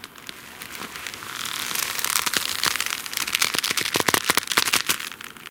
Fist clenching 1
block, clench, clenching, creaking, fist, hit, kick, leather, melee, punch